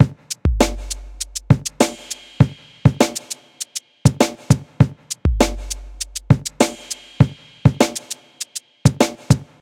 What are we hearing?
l4dsong loop couplet
More sophisticated version of the couplet / break drumloop
bass, drum, hiphop, loop, rythm, samples, tr808